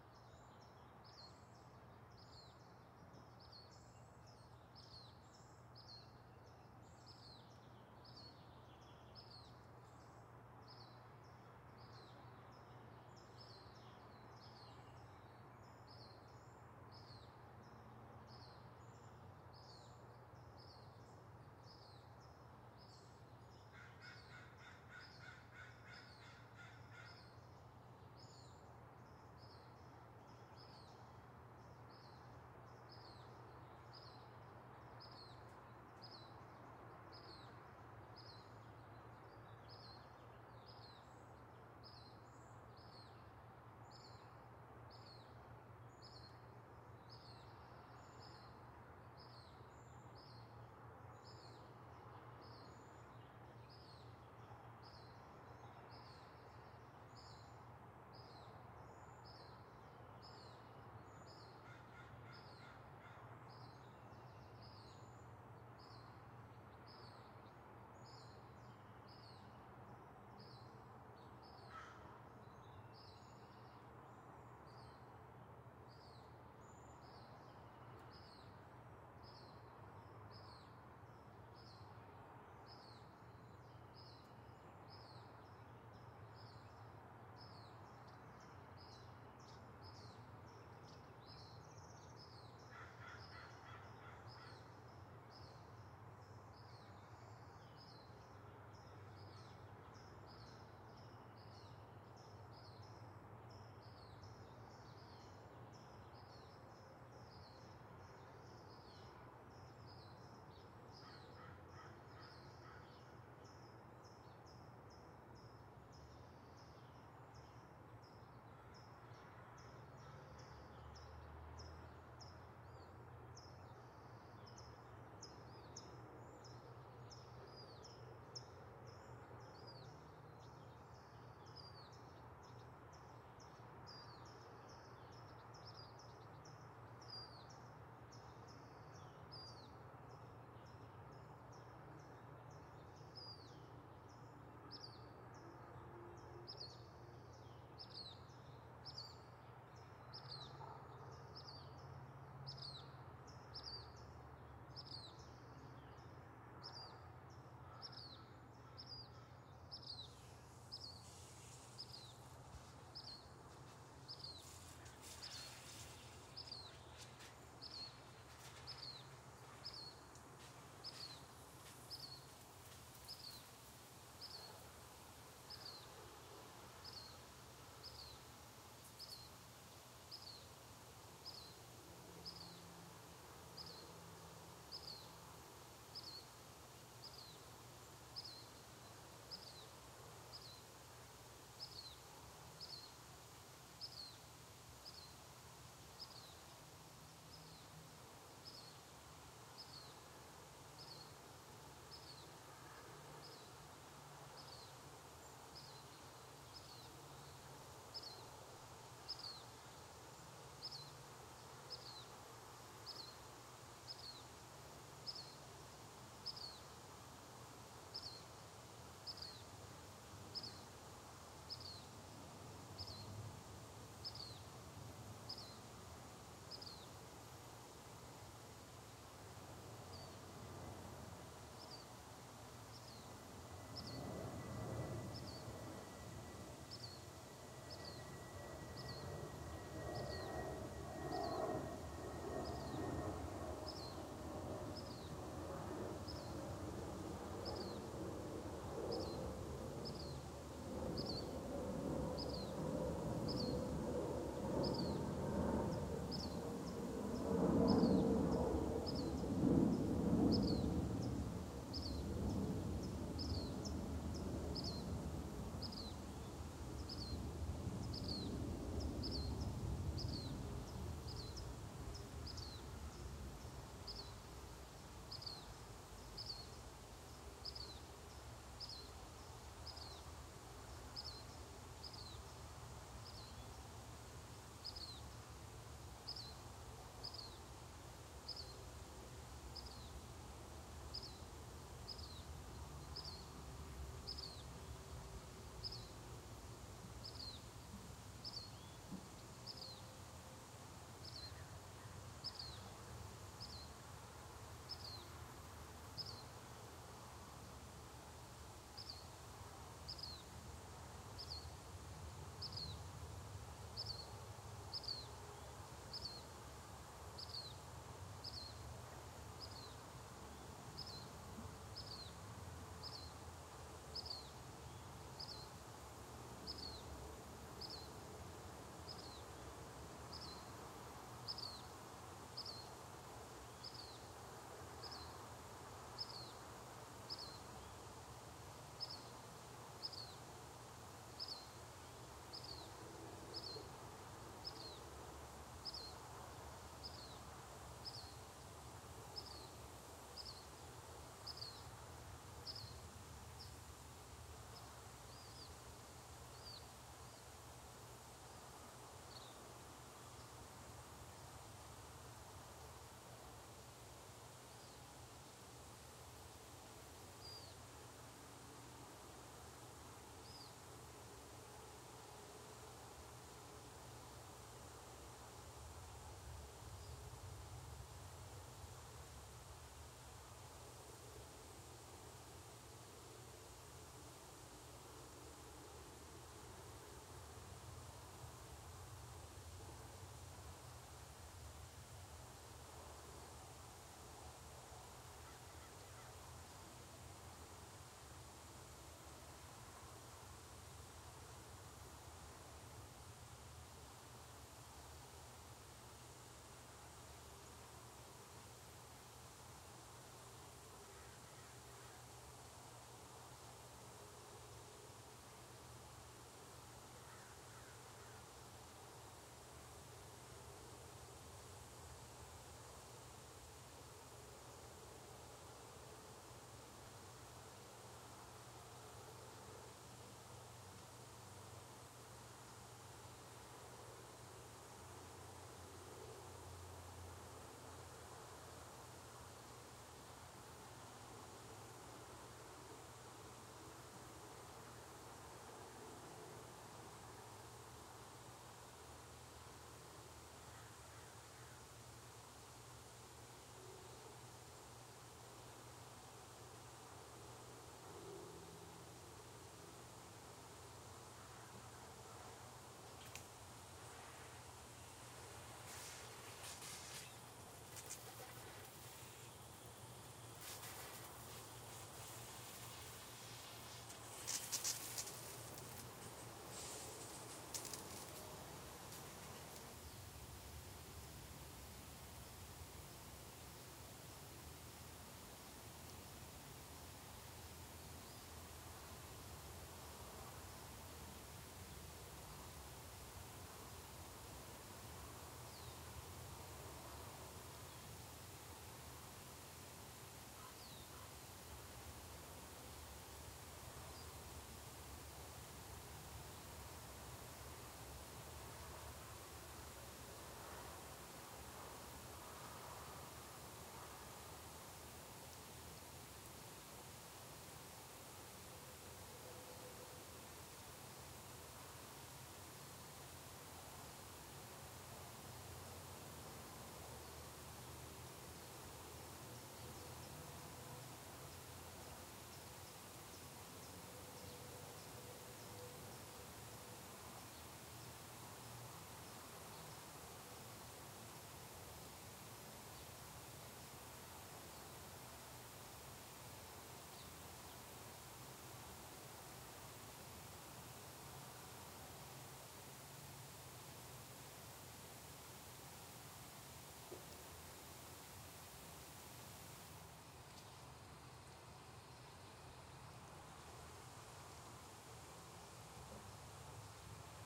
AMB Ext City Morning
My Los Angeles neighborhood in the early morning, around 6am. Towards the end of the clip, you can hear crows.
Recorded with Sanken CS-1e, Fostex FR2Le
ambience, birds, city, crows, distant-traffic, morning, neighborhood, residential